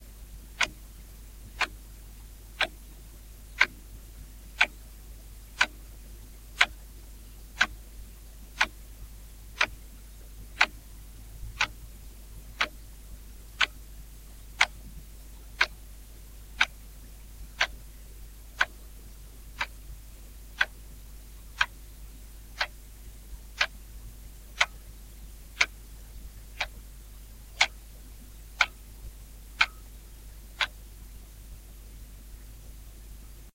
Wanduhr - ticken
Analog clock, ticking
analog, tick